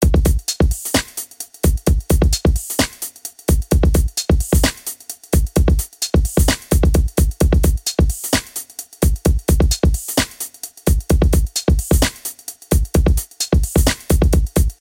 now beat 3
These sounds are from a new pack ive started of tracks i've worked on in 2015.
From dubstep to electro swing, full sounds or just synths and beats alone.
Have fun,
Synth, beat, Drums, Dance, loop, Trippy, Minimal, Dj-Xin, swing, Drum, EDM, Xin, Bass, House, Electro-funk